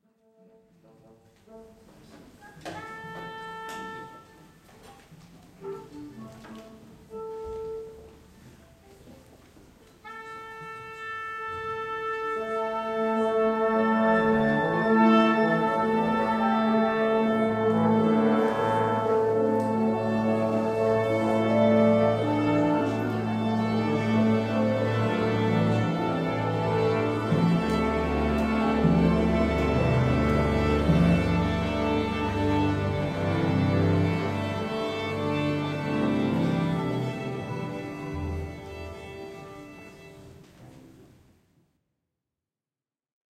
Symphonic band tuning before the concert
Classical band tuning before the concert.
1, 44, band, chlassical, instrument, orchestra, symphonic, tuning